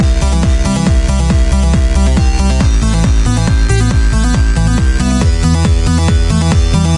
Dance Loop
Trance like loop.
Made in FL Studio with stock sounds.
Credits: R3K4CE
House, Trance, Dance